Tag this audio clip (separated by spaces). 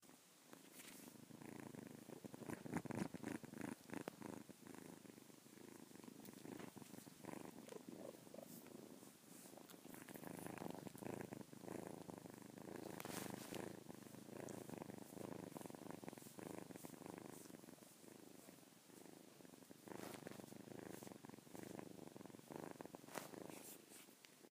Cat purr sniffing spin whir whirr